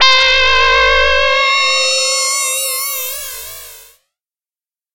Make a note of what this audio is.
Medium high pitched FM synth with inharmonic
spectrum in narrow bands, sweeping upwards with shimmering reverberant
spectrum. Same patch as other "interdimensional" sounds, but center
frequency makes a huge difference in how operators behave.
horror, sci-fi, sound-effect, synth